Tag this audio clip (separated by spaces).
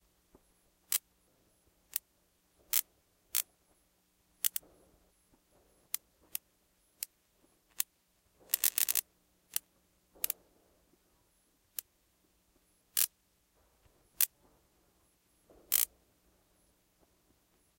bug electric electricity zap zapper